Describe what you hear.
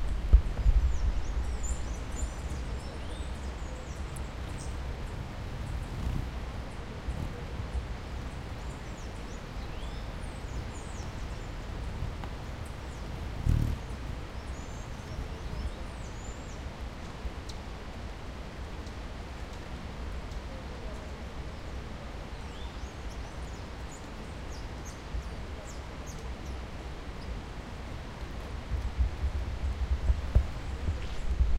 Hummingbirds Peru STE3
Stereo recording of hummingbirds feeding and fighting in the Peruvian Andes, in a forest, while raining. A strong river is running in the background.
Peru, forest, Stereo, birds, nature, Hummingbirds, Field-Recording, rain